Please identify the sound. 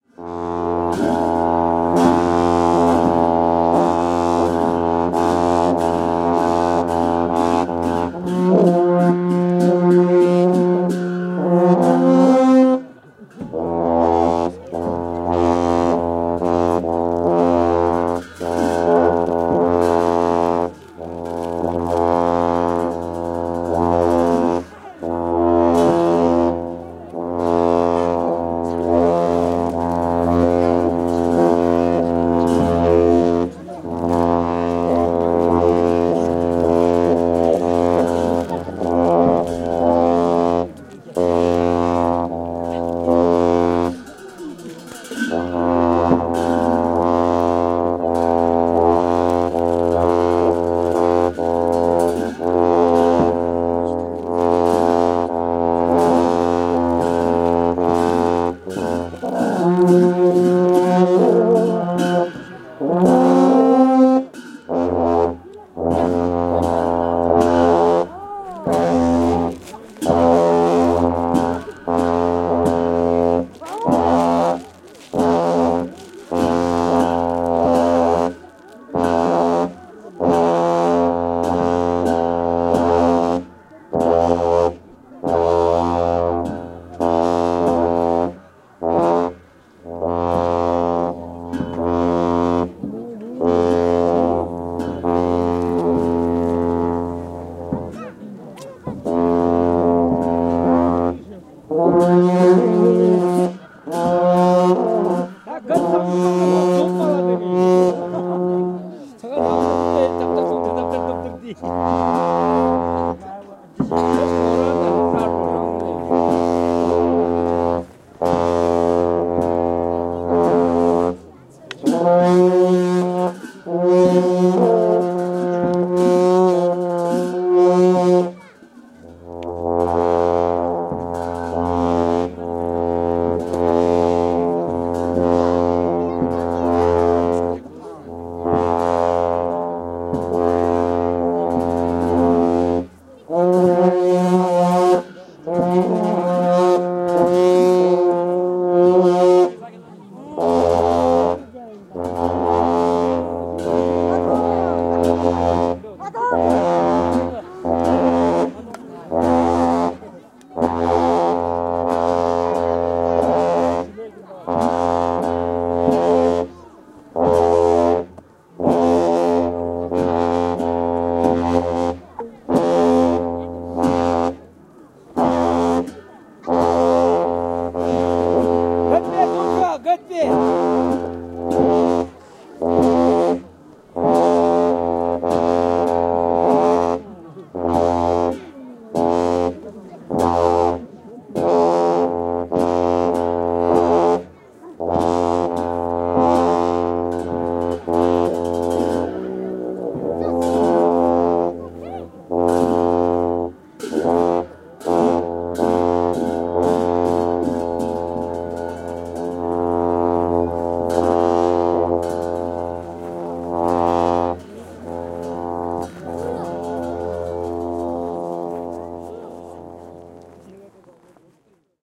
Dungchen Festival Horns - Bhutan
Field recording of large traditional Dungchen horns being sounded by Buddhist monks at a local festival (Tsechu), Bumthang region, Tung valley, Bhutan.
mini-disc
Asia, Bhutan, Bhutanese, Buddha, Buddhist, Bumthang, Dungchen, festival, Himalaya, horn, monastery, monk, red-hat, religion, Shangri-La, traditional, trumpet, tsechu, tung